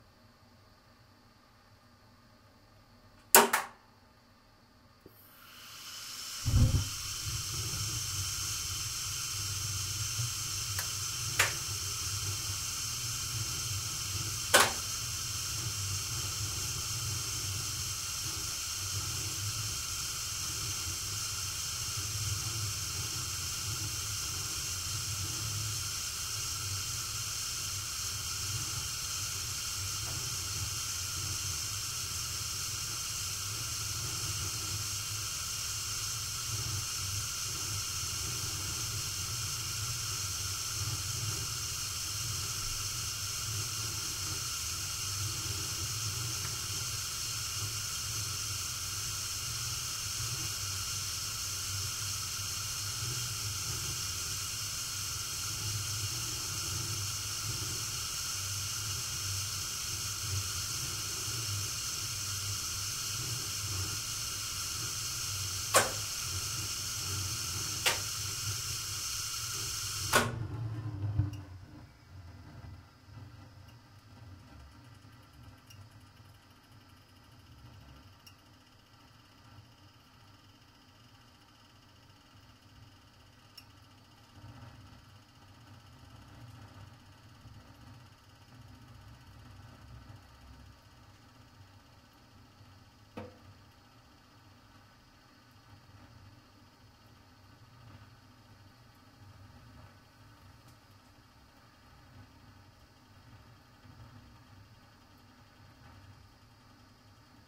gas boiler start stop 01
Stereo recording of a start/working/stop of a gas boiler. First there is turned a rotary switch connected to some relay. Then gas ignition is heard and after that boiler is doing its work. Some switches are heard when it's running as well.
The Boiler is switched off in a minute approx. and then it makes some noises during getting cold. Unprocessed. Recorded with Sony PCM-D50, built-in mics.